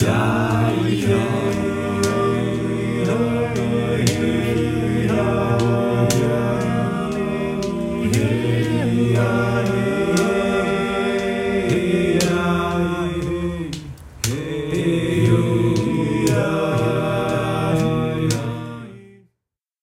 Village Singing
Me making random sounds to a clapping beat.
Edited with Protools
People, Sound